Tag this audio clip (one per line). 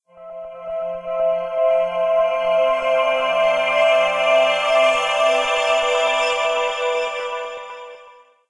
computer
computers
ditty
ident
intro
logo
log-on
music
operating
start-up
startup
system